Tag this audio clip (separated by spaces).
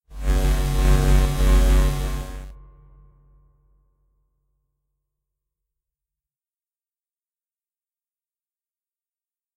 bass electrical electronic hum pulse zap